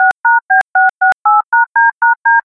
HARDOUINEAU Julien 2013 2014 son5
Made using Audacity only
Generate DTMF sound
Decrease the tempo of the sound
Add two more notes by copy-cut
Typologie : Itération variée
Morphologie :
Masse : Groupe tonique
Timbre : Froid
Grain : Lisse
Allure : Stable
Dynamique : Multiples attaques sèches
Profil mélodique : Variation scalaire